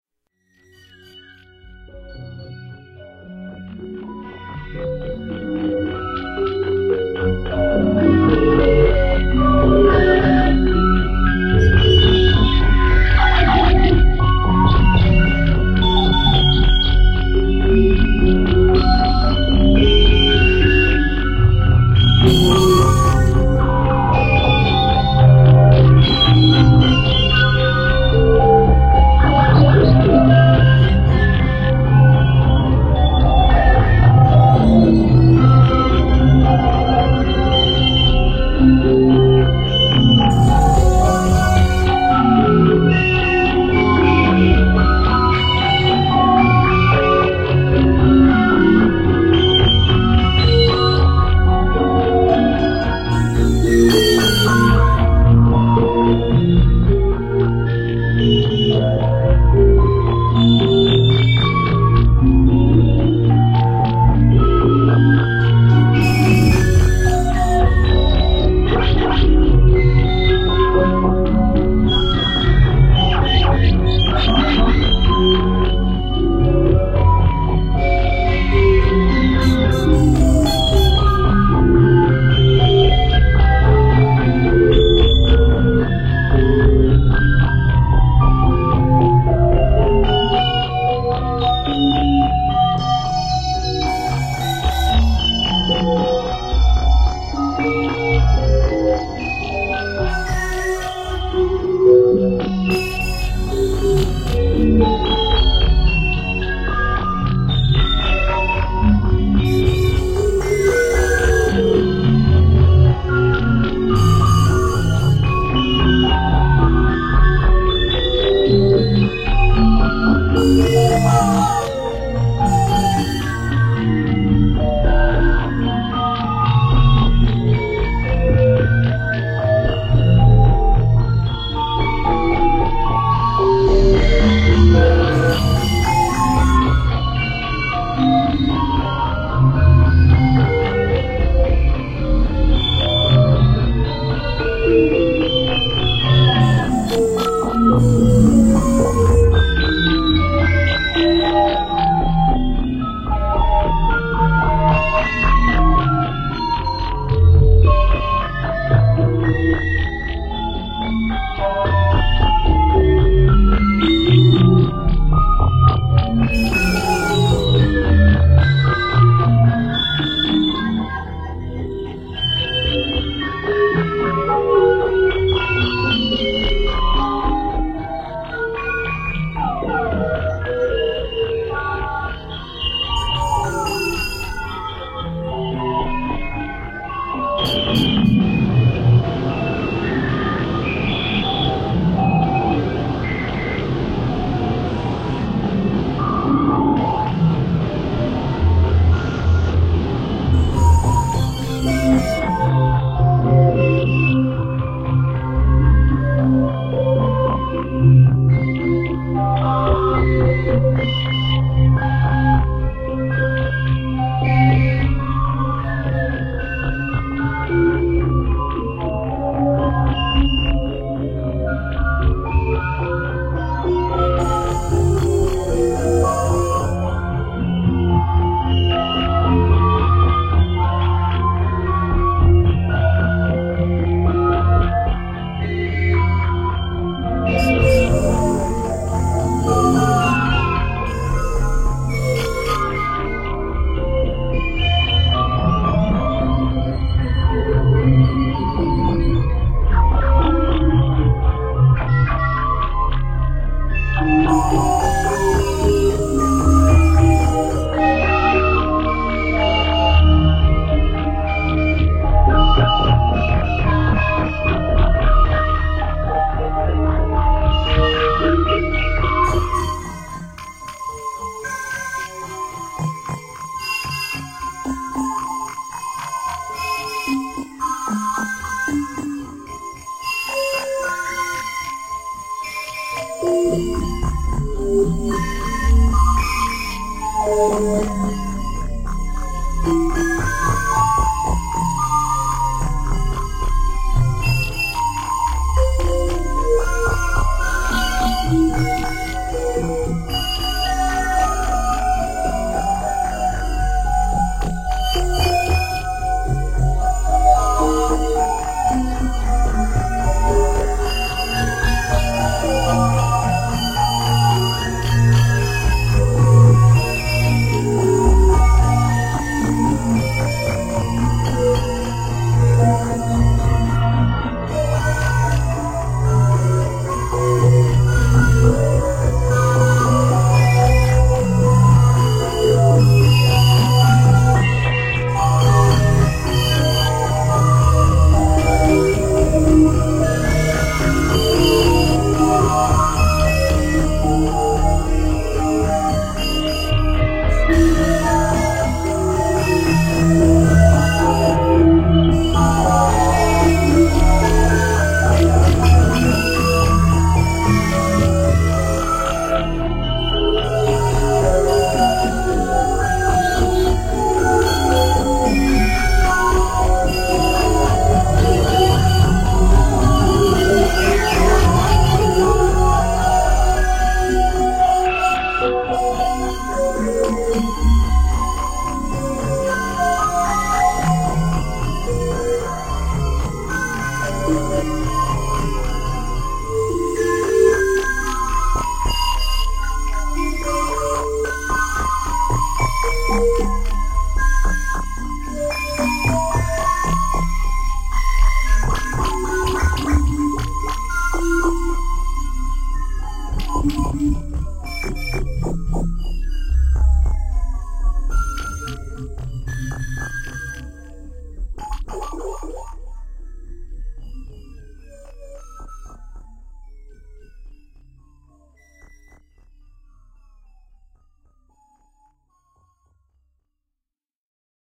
Dementia (part 2)
Part 2 of a dark ambient composition created using my Korg Triton.
ADPP, ambiance, ambient, atmosphere, atonal, background, creepy, dark, drama, electronic, experimental, haunted, horror, music, noise, scary, science-fiction, sci-fi, sinister, spooky, suspense, thriller, weird